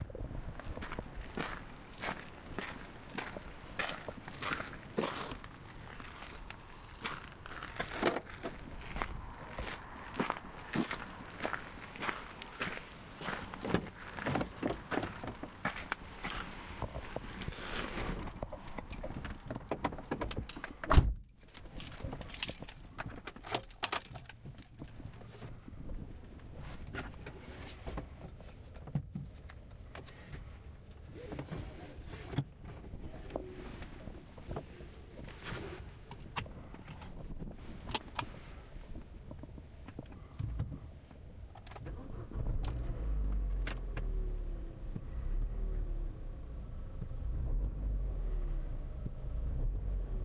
Content warning
Record of the walk and start of the car. On the recording you can hear footsteps on the rocky ground. Opening the car and then putting the key to the ignition and starting the car. Car sound is audible inside the car and may be hard to hear. I made the recording on the zoom H5 Handy recorder. the headphones used are Superlux. I used a SanDisk 32 GB card. Regards :)